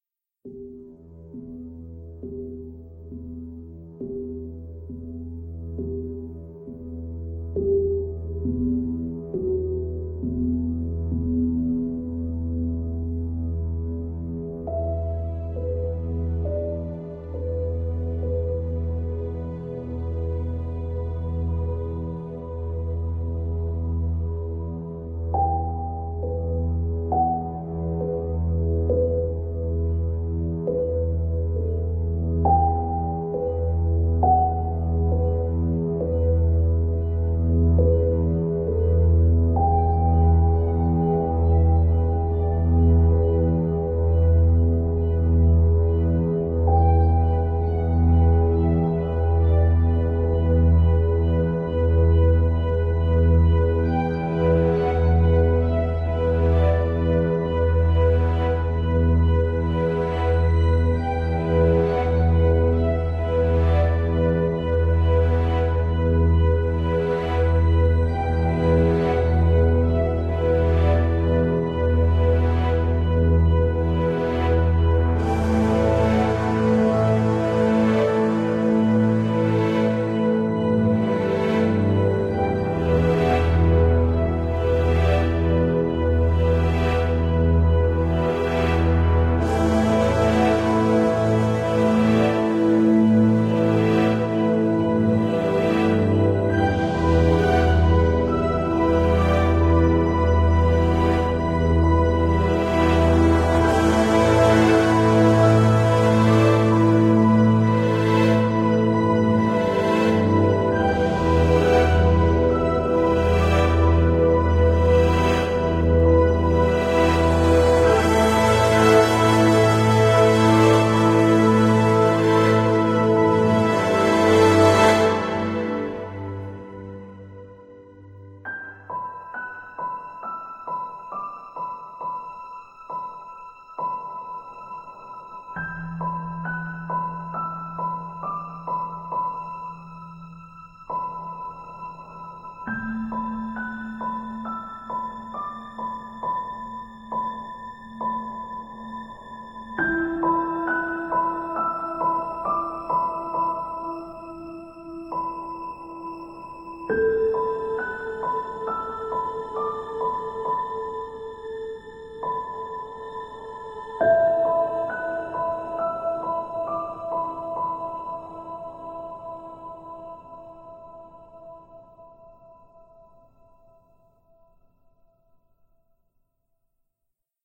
Sci-fi Evolving Soundtrack - Alien Covenent
covenent, dark, epic, theme-music, alien, electro, atmosphere, ambience, theme, cinematic, uplifting, alien-covenent, Orchestral, Symphonic, music, powerful, ridley-scott, sci-fi, science